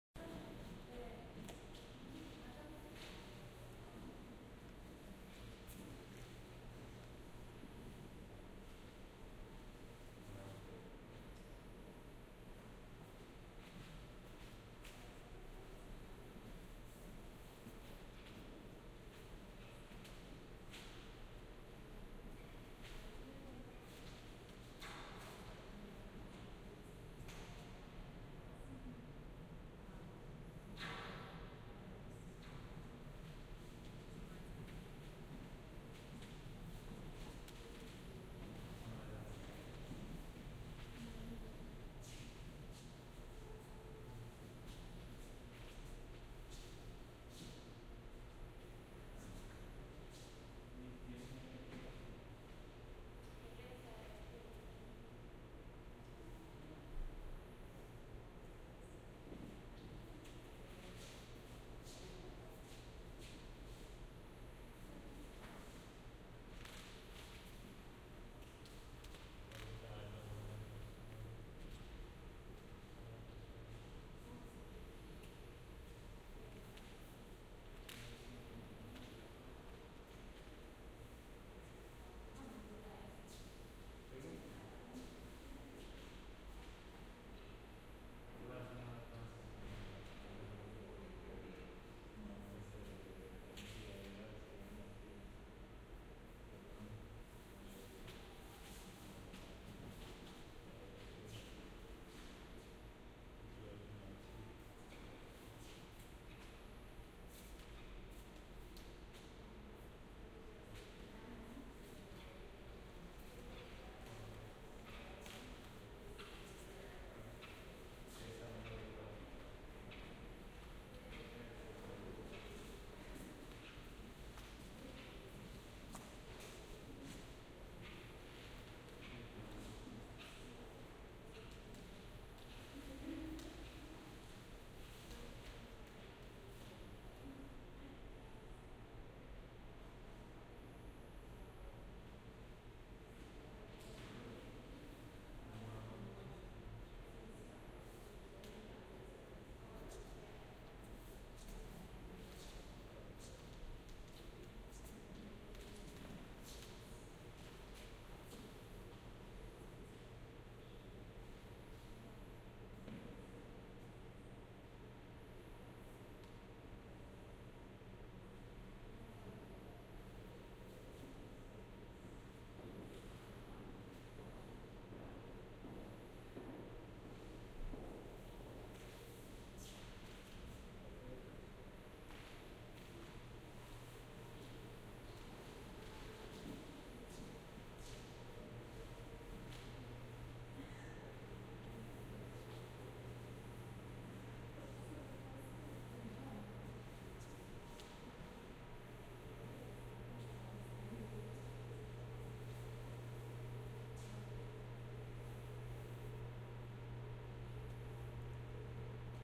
Museum Ambience
Recorded to iPhone using Sennheiser Ambeo Smart Headset (binaural mic).
Recorded at the Museum of Modern Art (MOMA) in NYC on April 21, 2021. Quiet ambience of interior art gallery on a day with light attendance. Some small background chatter throughout.
atmosphere, general-noise, background-sound, soundscape, museum, interior, ambiance, field-recording, ambience, background, ambient